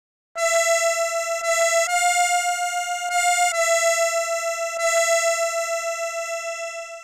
Italian Accordeon
made with an accordeon sample and edited in FL studio
accordeon, italia, italian, italy, napoli